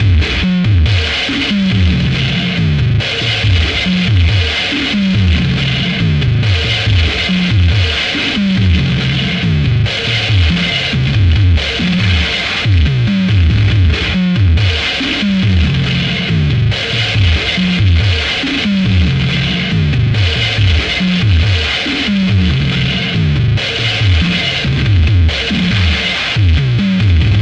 ATTACK LOOPZ 02 is a loop pack created using Waldorf Attack drum VSTi and applying various amp simulator (included with Cubase 5) effects on the loops. I used the Acoustic kit to create the loops and created 8 differently sequenced loops at 75 BPM of 8 measures 4/4 long. These loops can be used at 75 BPM, 112.5 BPM or 150 BPM and even 37.5 BPM. Other measures can also be tried out. The various effects are all quite distorted.